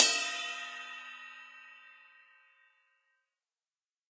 Multisampled 20 inch Istanbul pre-split (before they became Istanbul AGOP and Istanbul Mehmet) ride cymbal sampled using stereo PZM overhead mics. The bow and wash samples are meant to be layered to provide different velocity strokes.
cymbal, drums, stereo